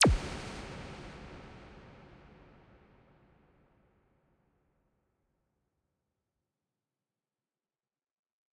Decent crisp reverbed club kick 8 of 11
reverb, 8of11, club, bassdrum, kick, crisp